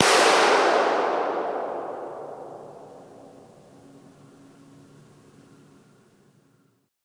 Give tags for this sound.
ambient; room; echo

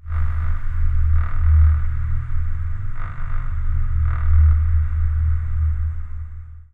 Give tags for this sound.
abstract; artificial; bleep; computer; digital; effect; electric; future; futuristic; game; glitch; noise; notification; robotics; sci-fi; sfx; sound-design; UI

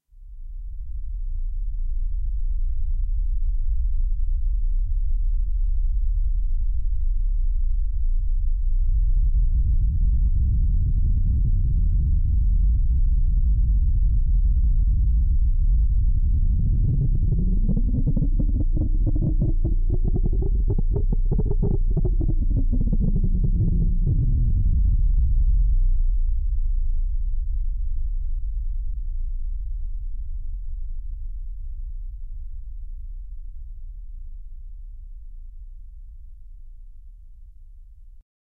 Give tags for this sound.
earth; subsonic; rumble

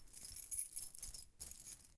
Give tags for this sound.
clang; ring; keys; ping; metal; ting

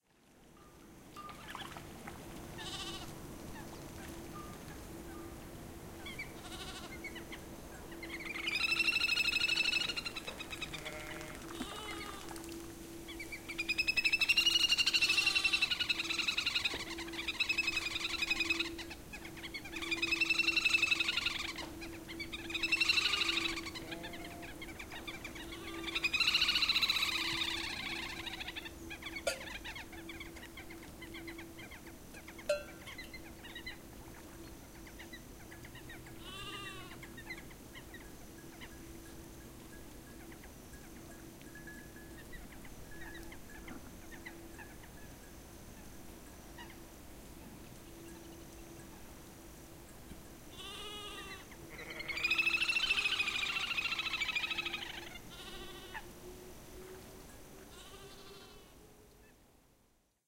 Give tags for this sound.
Delta-del-Llobregat; Deltasona; El-Prat; Little-Grebe; waterfowl

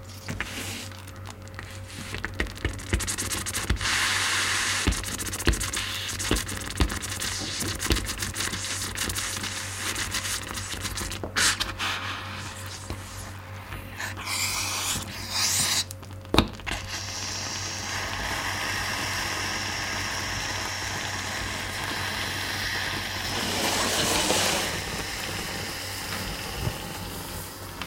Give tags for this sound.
boiling; cooking; mashed-potatoes; porridge; steam

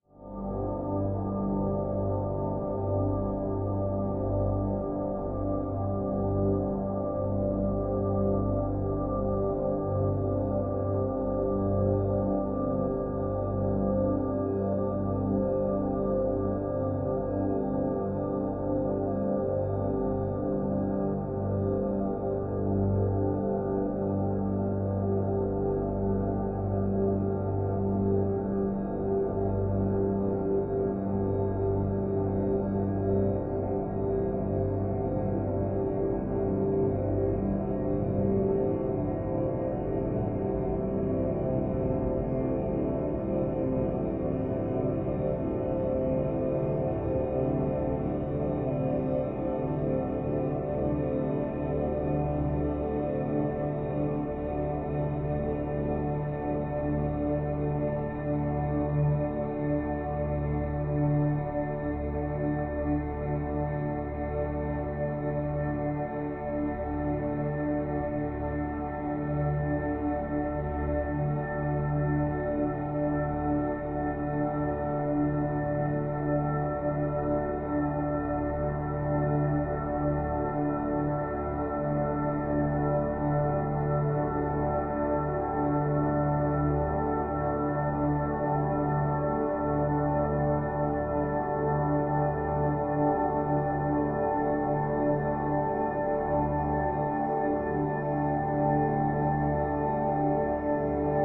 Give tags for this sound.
drone; relaxing; soothing

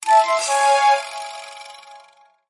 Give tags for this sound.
notification; digital; electronic; synthesized

